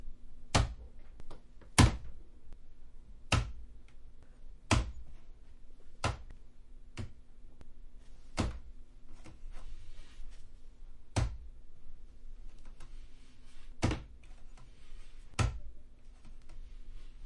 016-Hitting alarm clock
Blumlein stereo (MKH 30) recorded with AETA 4Minx inside a Parisian flat, edited (creating some clicks)
Hit, plastic, object